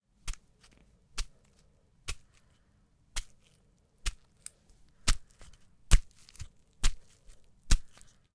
To create this sound I stabbed an apple with a pair of scissors in my bedroom to replicate the sound of someone being stabbed.
Murder, stab, Stabbing, stabbing-somebody, Stabbing-someone